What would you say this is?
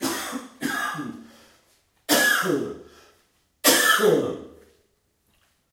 cought!
device: zoom h1
sound-effect, home-recording, cought